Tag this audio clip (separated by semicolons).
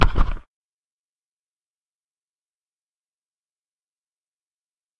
mic; click; bump; hit